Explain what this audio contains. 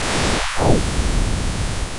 Spoon1 Clip inverted
Took another photo of the same teaspoon on the kitchen work surface with my iphone. This time the spoon is facing the other way. I wanted to see if the different shadows made any difference to the sound, or if it would simply sound like the same sound played backwards.
Since the work surface is white, I tried inverting the colours to get a black background on the image (which works better for converting an image to sound using AudioPaint).
Unfortunateyl that did not work very well and I ened up with a grey background.
Converted to sound using Audiopaint, standard parameters.